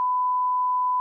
1000 hz, 1 second of 25-frame/sec code (PAL)
Sine signal of 1000hz generated to -20db of peak with a duration of 1 second to 25 frame/sec (PAL (Europe, Uruguay, Argentina, Australia), SECAM, DVB, ATSC)
1000hz, 1khz, 25, ATSC, DVB, frame, PAL, sec, SECAM, signal, sine, sinusoidal, Timecode, TV